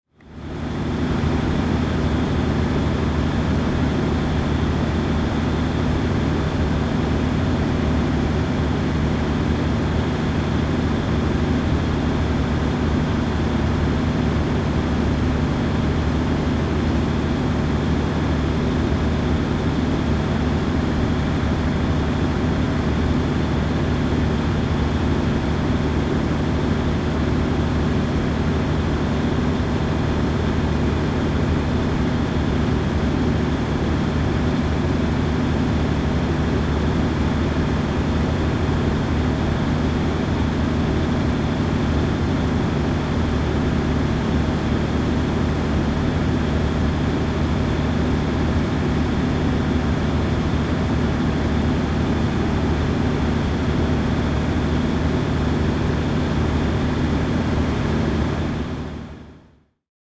This is a recording of a large electricity generator, brought in to supply power to the substation on the site of the local community theatre, after the cable feeding the substation was damaged elsewhere. The generator was the size of a shipping container. Recorded with a Roland R26, at a distance of 10 metres from sound source.
electricity-generator, Large-electricity-generator, large-generator